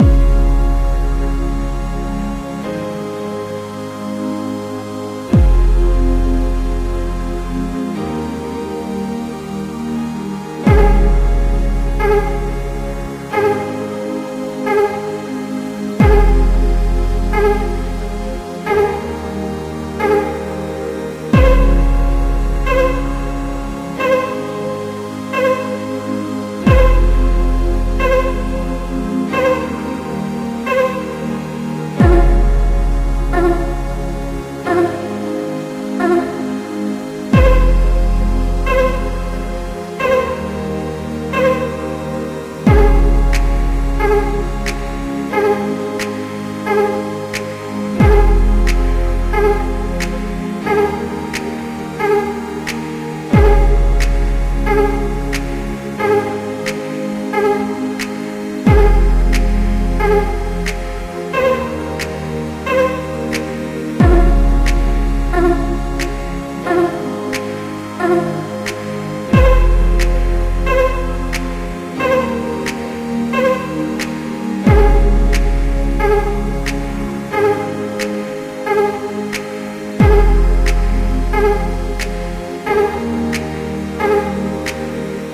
Electro vox x3 - Intro music-
Synths: Ableton live,Kontakt,silenth1-